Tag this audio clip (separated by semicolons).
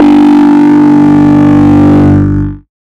bass; bassline; dnb; dubstep; lfo; loop; low; sub; wobble